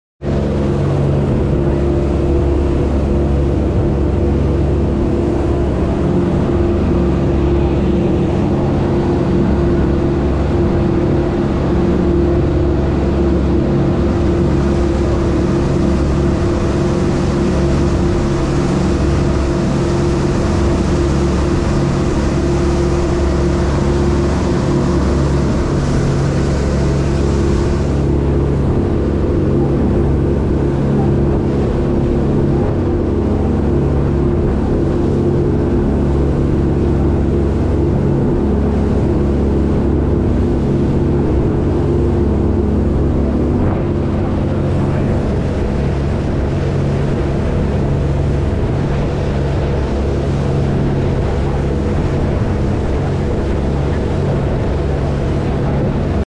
I recorded the sound of a twin engine motor boat on a boat trip in the ocean. These are twin 250HP 4 Stroke Suzuki engines.